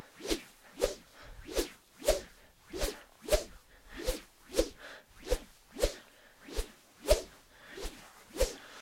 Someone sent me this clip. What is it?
Fighting Whoosh 4
By swinging our badminton racket through the air, we created something that sounds like an arm or a leg that makes a fighting whoosh sound through the air.
Air, Fly-by, Foley, Swing, Slash, Move, Whoosh, Leg, Wind, Punch, Arm, Slap